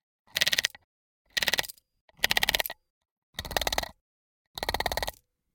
Drilling Bursts
Five bursts of some sort of drilling mechanism. Made from a clockwork device touching the shotgun mic and running at a fast speed
mechanism, fast, motor, gun, electric, machine, noise, robotic, robot, machinegun, machinery, drilling, sci-fi, clockwork, clock, bursts, factory, mechanical, industrial